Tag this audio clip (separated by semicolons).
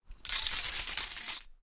glass; shuffle